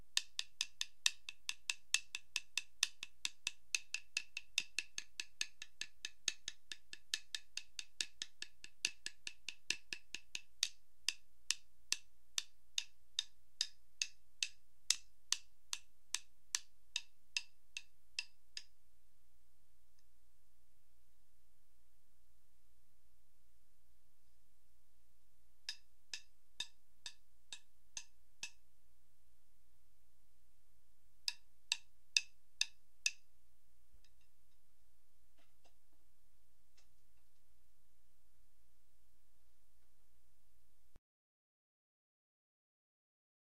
Various unprocessed hits from Zildjian drum sticks that came with Rock Band. Recorded through a Digitech RP 100.